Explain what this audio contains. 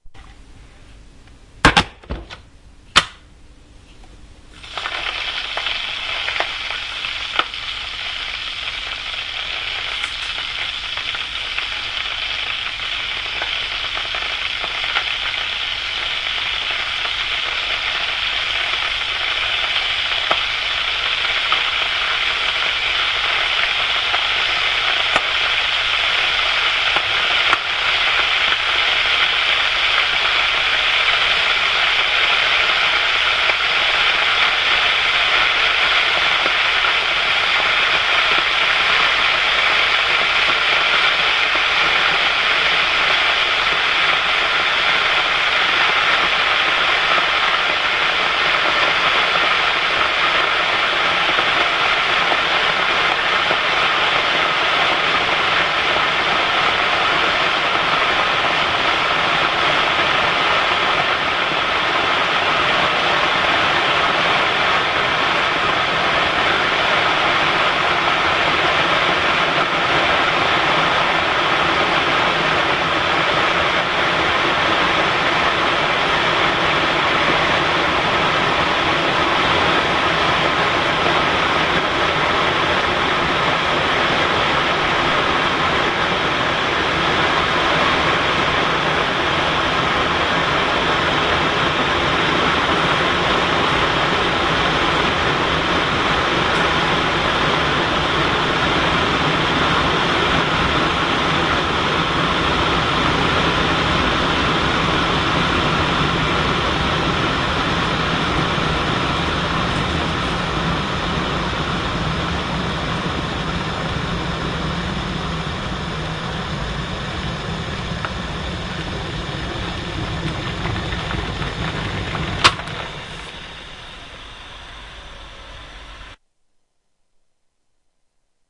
An electric kettle being put on its base, turned on and boiling. Sorry its so long (lost of tea drunk that day!)
Recorded onto My Sony Minidisc
kettle, boiling, steam, being-boiled